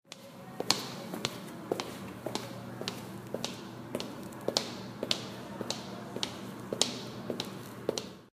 Gravador de áudio zoom H4N e microfone Boom. Efeito sonoro gravado para a disciplina de Captação e Edição de Áudio do curso Rádio e TV, Universidade Anhembi Morumbi.
passos, popular, uam-maudio17, p, s, efeito-sonoro